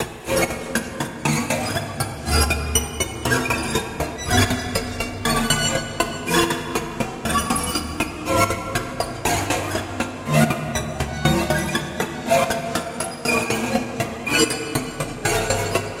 Crazy Orchestra FX (120 BPM)
Crazy Orchestra. This is it. 120 BPM
Cinematic, Effect, Film, FX, Movie, Orchestra, Rhythm, Sound, Soundeffect